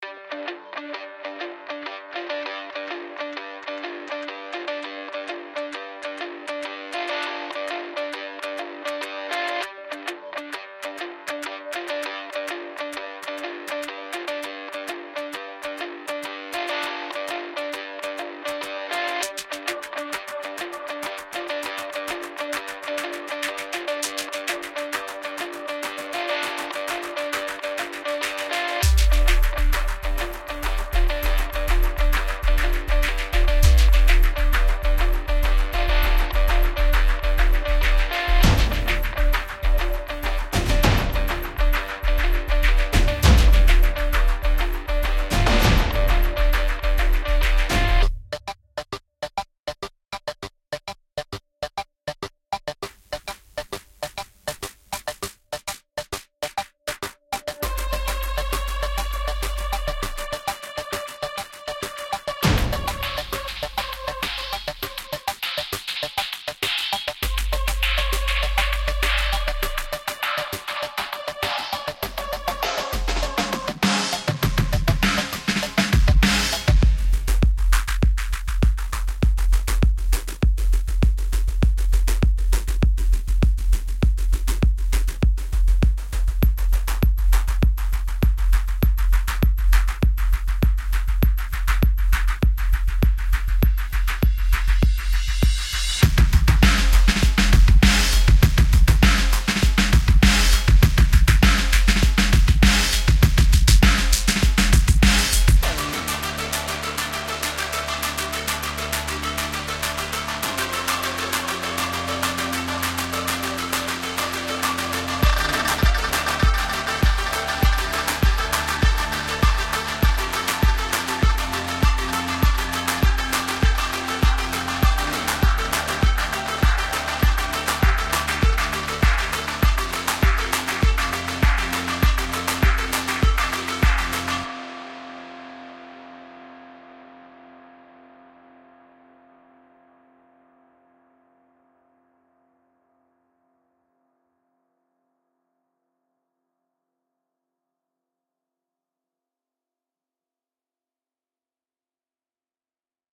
cyberpunk heist
Fast paced music part of a series of concept track series called "bad sector" sci-fi
bass, cyberpunk, digital, eguitar, electronic, fast, game, heist, music, noise, payday, sub, synth